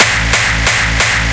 xKicks - I Only Need Half a Brain 2
Do you enjoy hearing incredible hard dance kicks? Introducing the latest instalment of the xKicks Series! xKicks Edition 2 brings you 250 new, unique hard dance kicks that will keep you wanting more. Tweak them out with EQs, add effects to them, trim them to your liking, share your tweaked xKicks sounds.
Wanna become part of the next xKicks Instalment? Why not send us a message on either Looperman:
on StarDomain:
single-hit, kick, kick-drum, beat, hardcore, dirty, hard, 180, bass, distortion, gabber, distorted